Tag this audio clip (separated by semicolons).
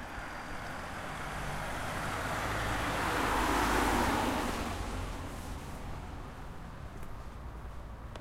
driving; van; vehicle